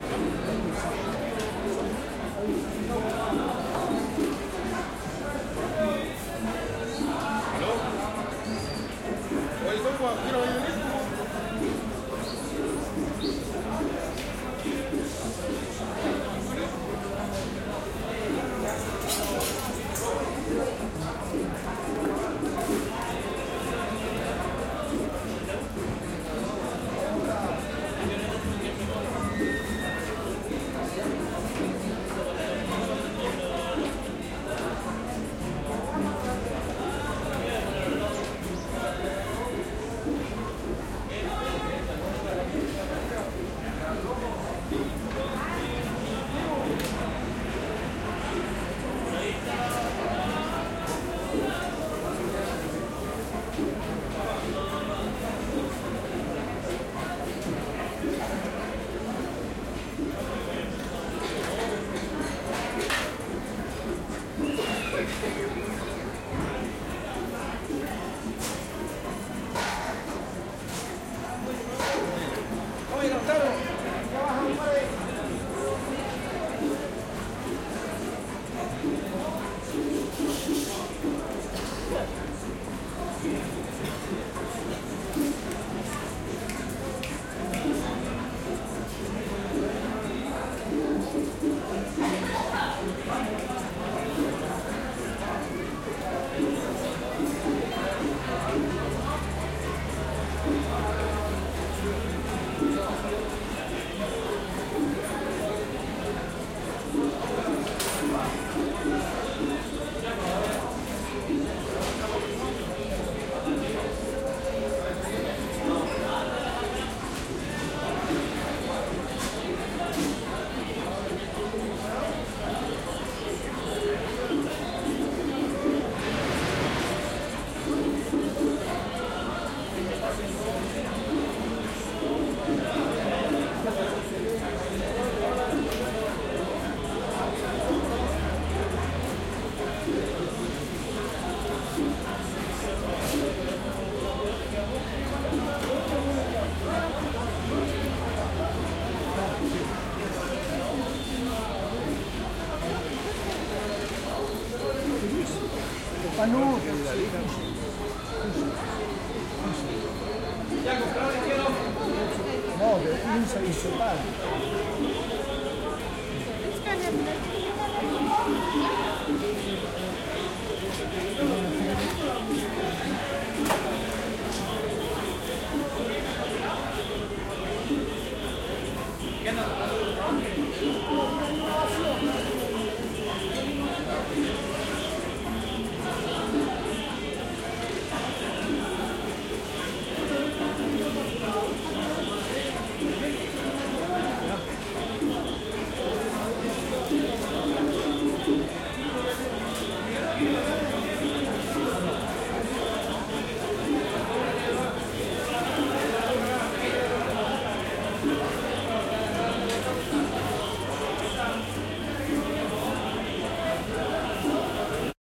mercado central 02 - cocinas
Mercado Central, Santiago de Chile, 11 de Agosto 2011.
Cumbias, cuchillos, voces, bandejas de metal.
Cumbias, knifes, voices, metal trays, market
santiago, market, kitchen, food, sea, ostras, chile, restaurant, central, mercado